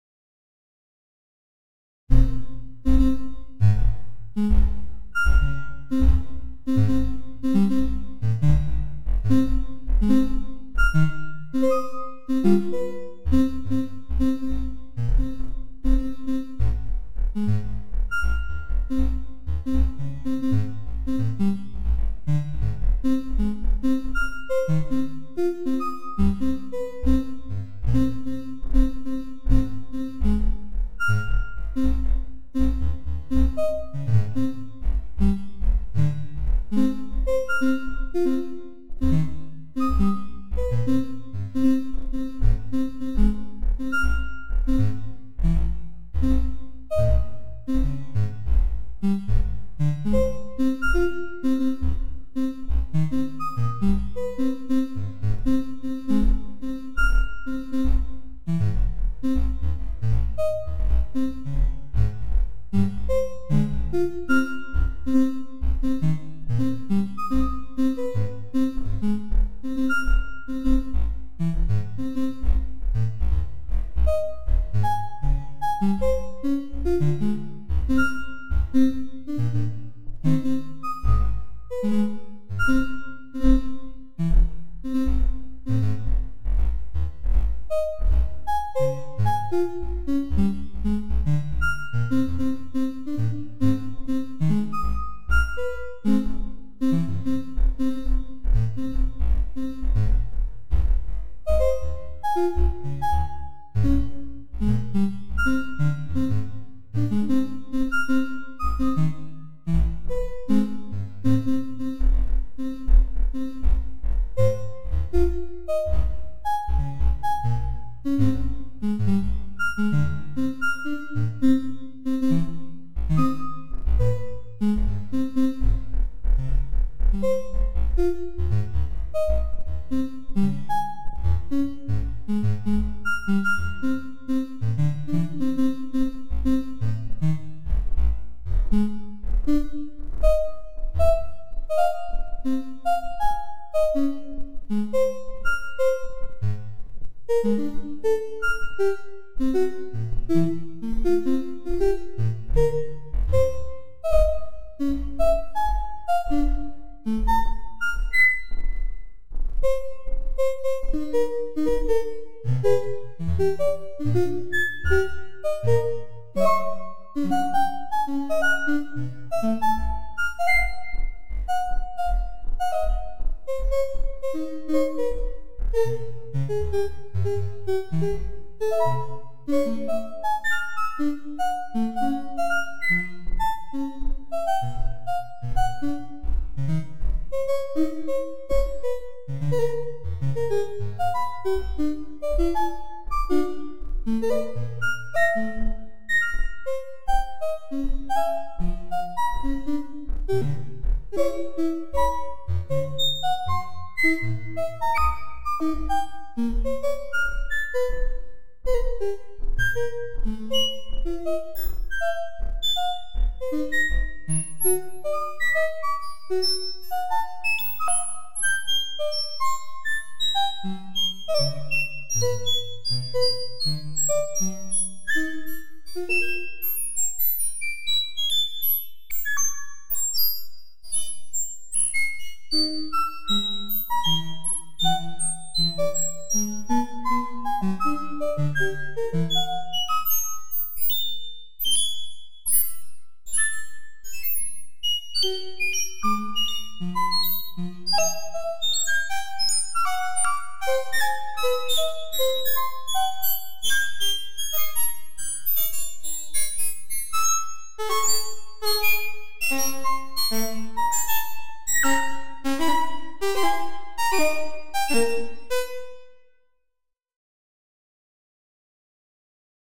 pure data improvisations (9)
estudos e divertimentos diversos ao pd.
digital-synthesis, electronic, fora-temer, free-improvisation, glitch, long-shot, noise, programming, pure-data, soundscape, synth